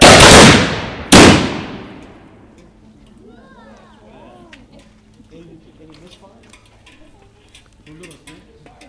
Musket shots
gun, Musket, shots